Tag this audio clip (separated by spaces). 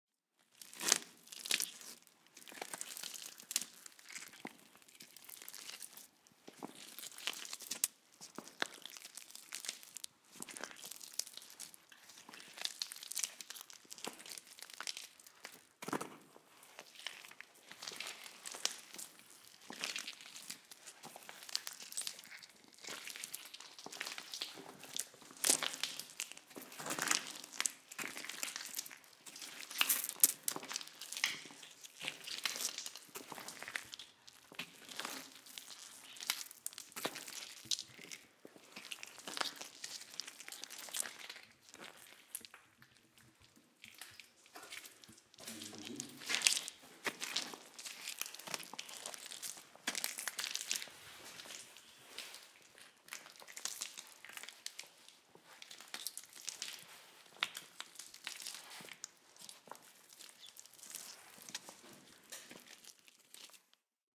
walking person